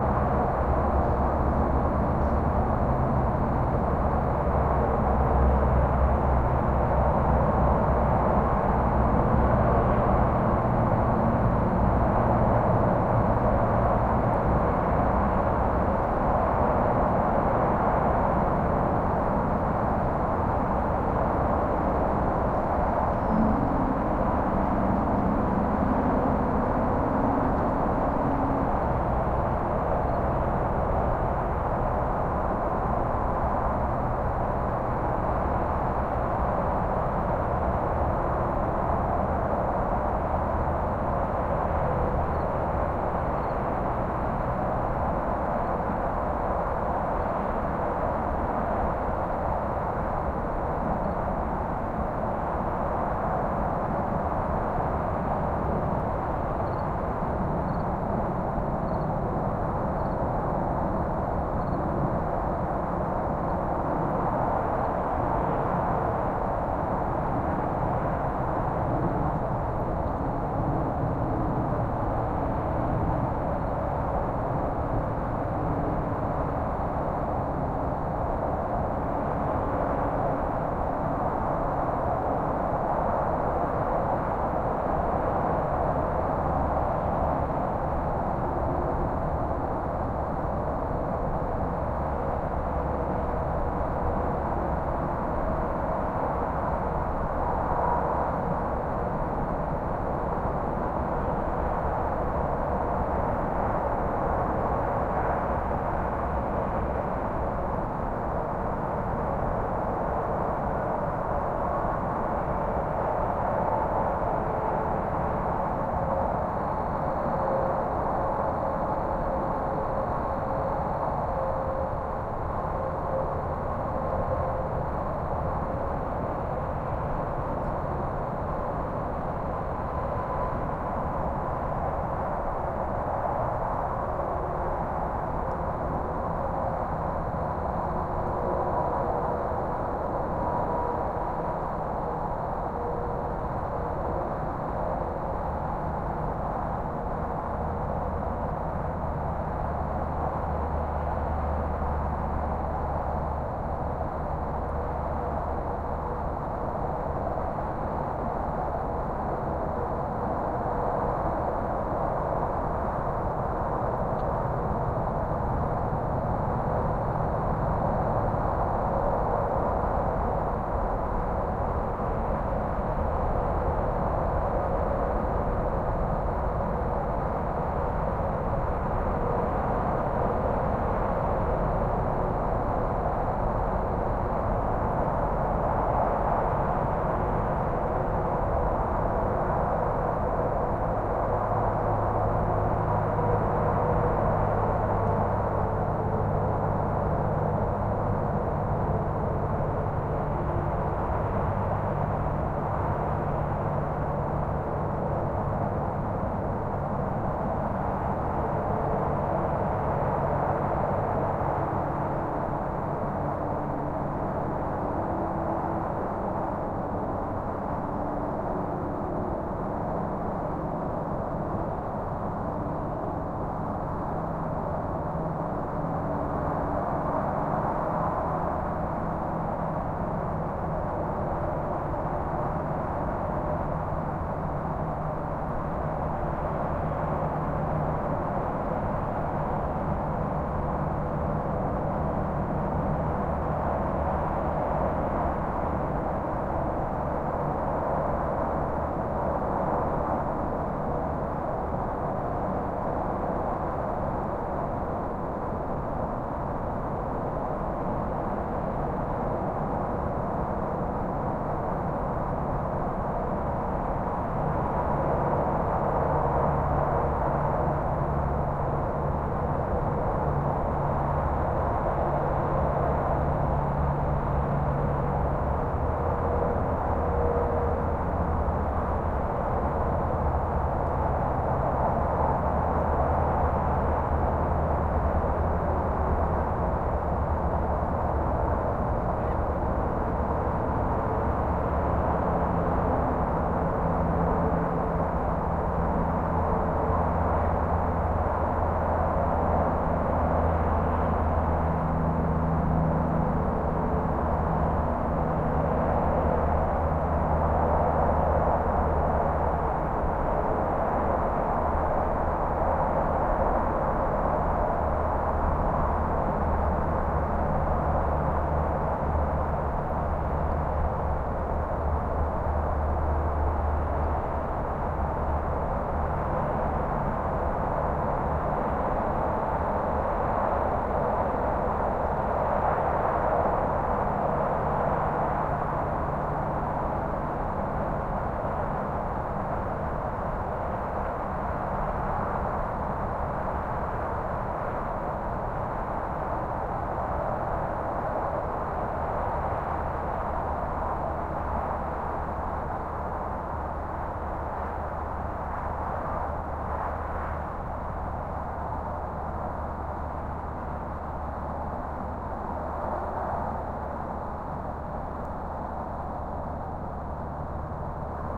skyline highway traffic distant far or nearby haze from campground with some crickets +distant creepy truck engine brake at start
campground, crickets, distant, far, haze, highway, skyline, traffic